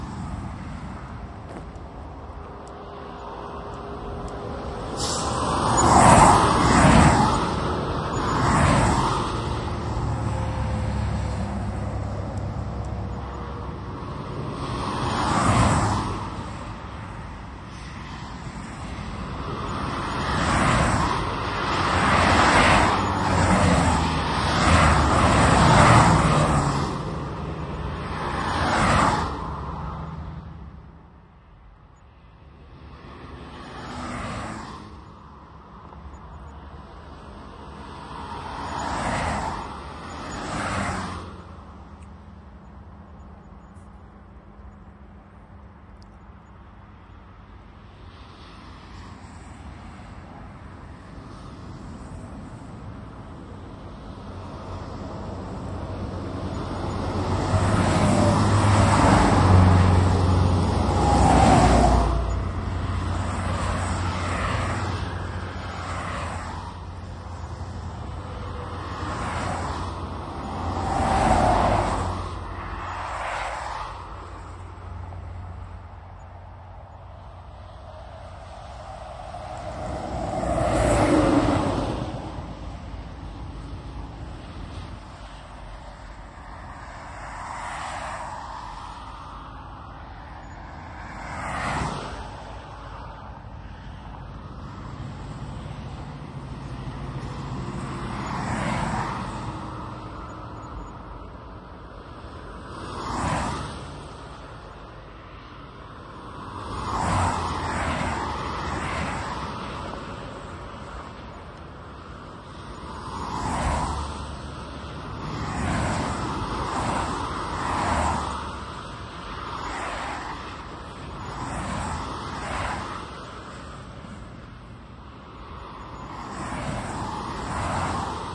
Highway ambience recorded somewhere in Denmark.